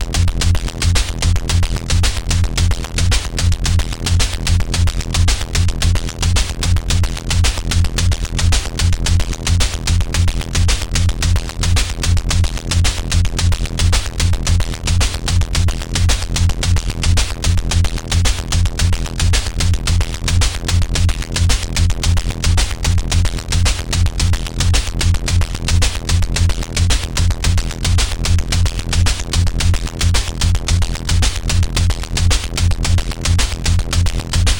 Distorted, broken, analogue loop, monotribe [111 BPM] BUSY
Dug up from an old project.
Korg Monotribe groovebox processed by a Doepfer A-189-1 Bit Modifier, a lowpass- and a highpass-filter.
Recorded using NI Maschine.
11.10.2013
It's always nice to hear what projects you use these sounds for.
broken, groovebox, processed, drum-loop, analogue, driven, electronic, drum, rhythmic, analog, loop